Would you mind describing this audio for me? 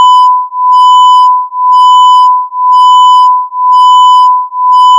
1000 Hz vs 1001 Hz
Just for fun, I put two tones on top of each other generated in Audacity. The first tone was 1000 Hz. The second tone was 1001 Hz. I put them on top of each other, and this was the result. The tones must be arguing with each other! (if I do 1000 Hz vs. 1000 Hz, the tone just gets louder) Interesting...